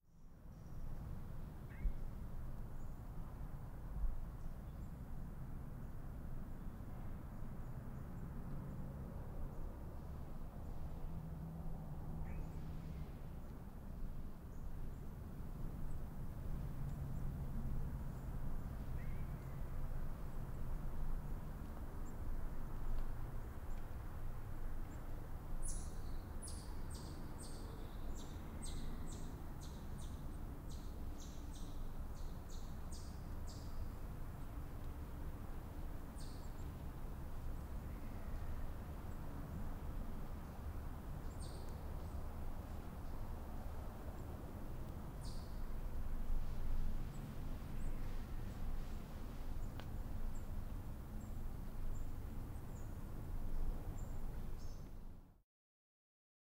Ext-amb subdued forest late-fall-evening
Subdued sound of a forest in the late fall.
subdued, forest, late, ext, fall, evening, ambience